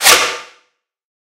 A sharp sound effect from distortion and filter manipulations.